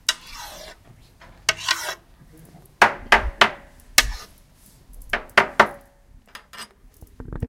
Here are the sounds recorded from various objects.